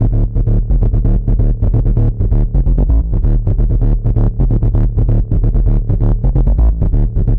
130 wooden bass
Bass line created in Jeskola Buzz at 130bpm C2 using VST Instrument Alchemy with preset with DanceTrance/Bass/Wood Master tweaked by limiting the effects for a more raw bass sound.
industrial, rave